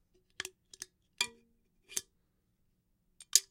Breaking a pop tab off the top of a soda can.